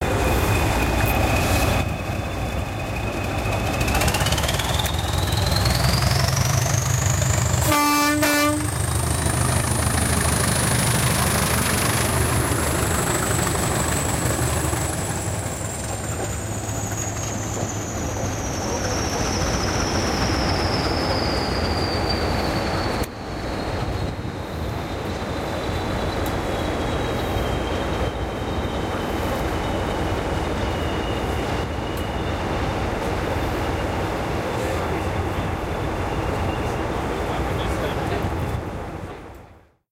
Type 20s leaving Train at Crewe
Gorgeous sound of two vintage British diesel locos pulling a 13 carriage train. Recorded using the built in mics on a Cannon Powershot G15 then processed to try and remove as much wind noise using iZotope Ozone in M/S mode, (Much of the wind noise was in the side channel).